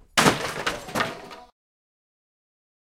Dirty Old Wooden Table Creaking Hulking Break
We threw a giant wooden table off of a balcony. I recorded it. The sound is p wild.
Recorded with a Tascam DR100mkii
drum-kits, field-recording, percussion, sample-pack